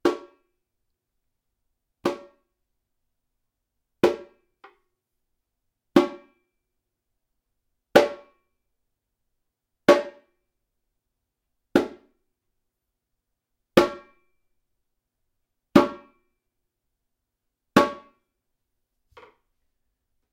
repinique-hand
A repinique (samba drum), hit with an open hand.
Recording hardware: Apogee One, built-in microphone
Recording software: Audacity